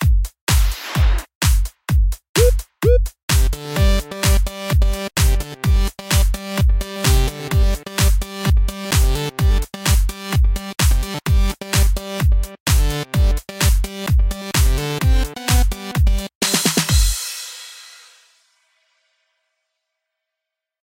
Intro theme for Jace Atkins.
Intro, funny, heroic, silly, superhero, theme